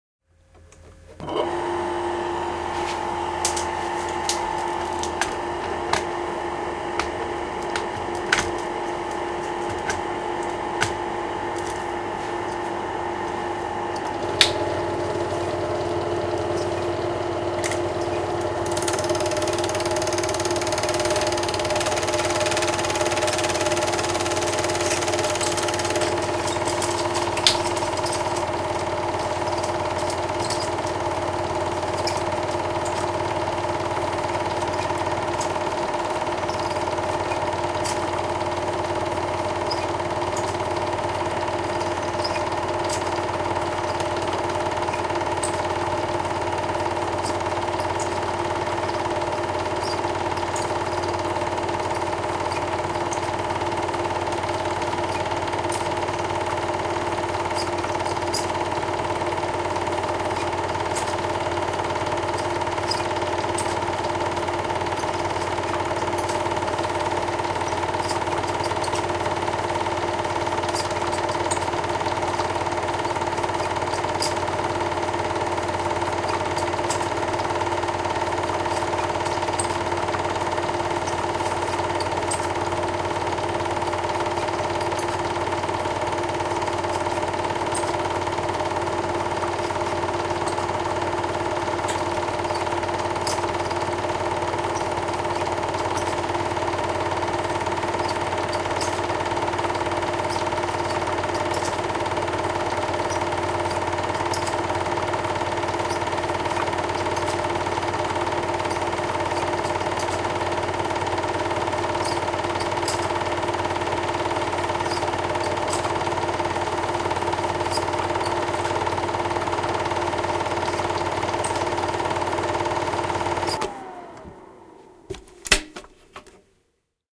Kaitafilmiprojektori, filmiprojektori, käynti / Film projector, narrow-film, load up, start, running, film rasping, stop, switch off, a close sound, Bolex SP80
8 mm projektori Bolex SP80. Käynnistys, filmin pujotus, käyntiä, projektorin surinaa, filmin rahinaa, pysähdys, sammutus.
Paikka/Place: Suomi / Finland / Nummela
Aika/Date: 26.10.1999
Run, Filmi, Finnish-Broadcasting-Company, Filmiprojektori, Field-Recording, Suomi, Projektori, Yle, Film-projector, Home-movie, Soundfx, Projector, Tehosteet, Yleisradio, Film, Finland, Kaitafilmi